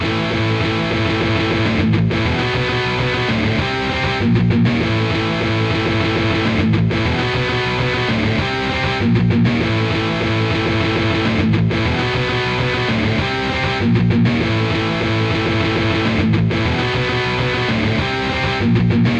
A short loopable riff I created in Samplitude, alas I have no real guitar!
riff, cool, power